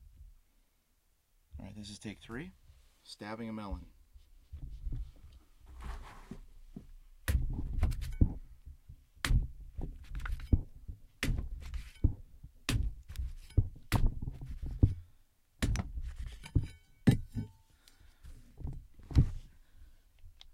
melon-stab-take3
Sounds of a knife stabbing a melon that creates the audio fx of a person being stabbed or attacked with a knife.
foley, knives, sound-effect, stab, stabbing, studio-foley